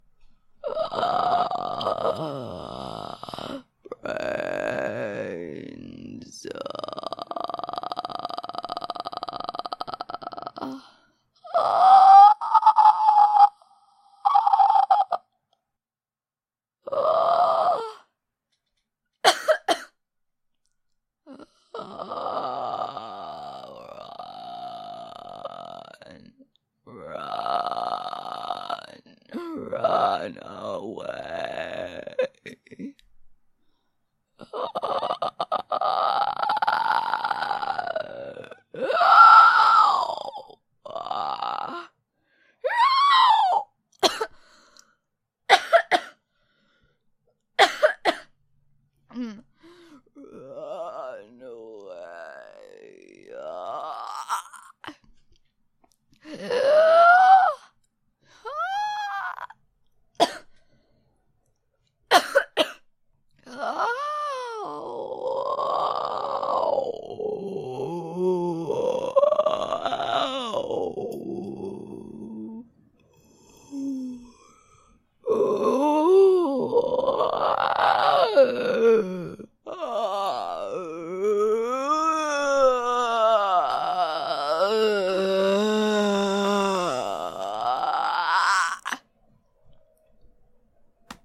Monster/Zombie & Coughing sounds
solo, coughing, creature, cough, roar, horror, growl, beast, monster, zombie, undead, snarl, voice, dead-season, scary